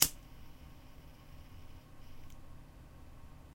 Sound of a lighter then hissing as it burns.